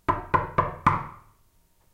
Tür klopfen 02
Door knocking
Recorder: Olympus Ls-5 and Ls-11
thrill, atmos, background-sound, atmosphere, atmo, klopfen, t, knock, terror, knocking, knocks, door, rklopfen, background